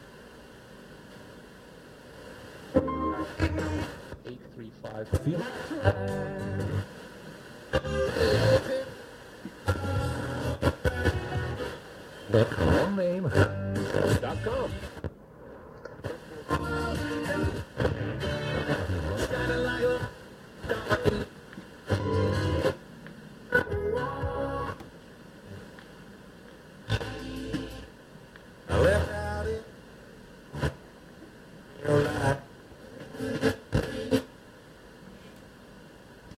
Scrolling the length of the radio dial in Bozeman, MT. Recorded with Rode NTG-2 mic into Zoom H6 Handy Recorder.